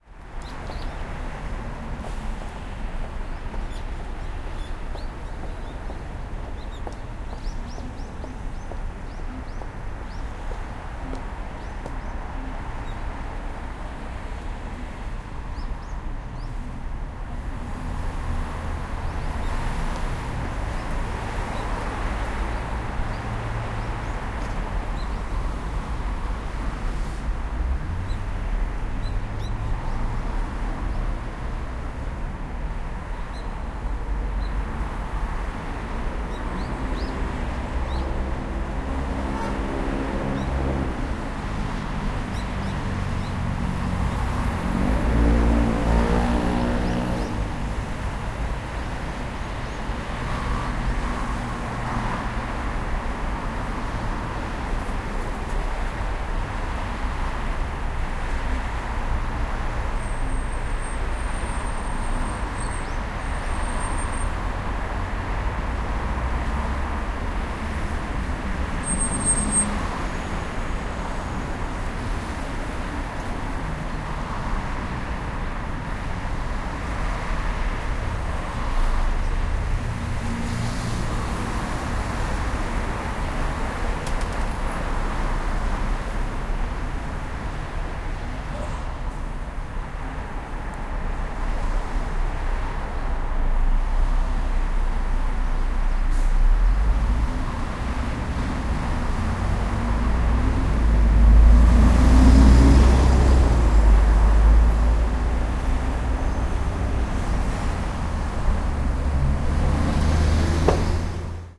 0035 Traffic straight passing and background
Birds, footsteps, traffic background. Cars and motorbikes straight passing. Horn from one car.
20120116
birds, cars, field-recording, footsteps, horn, korea, motorbike, seoul, traffic